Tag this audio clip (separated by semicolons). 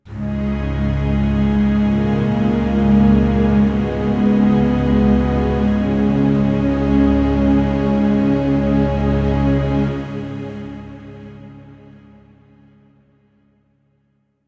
ambience; ambient; atmosphere; background; chord; cinematic; dark; drama; dramatic; film; instrument; instrumental; interlude; jingle; loop; mood; movie; music; outro; pad; radio; scary; soundscape; spooky; suspense; thrill; trailer